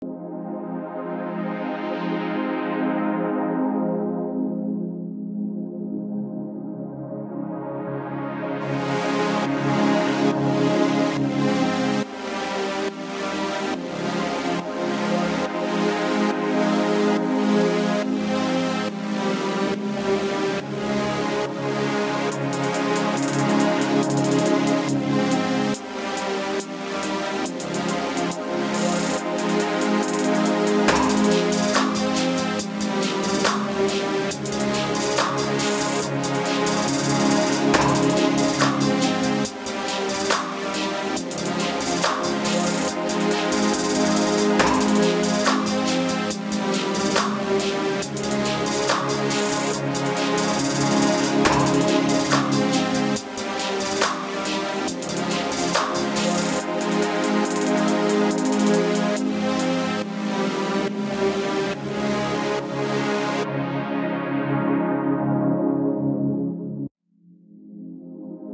A ambient soundtrack good for nostalgic/credit scenes
scene, Mood, Nostalgic, Electro, Nostalgia